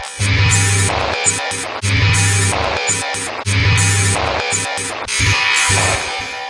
pulsing fist
Granular, Rhythmic, Textured